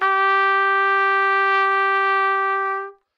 Part of the Good-sounds dataset of monophonic instrumental sounds.
sample, single-note, trumpet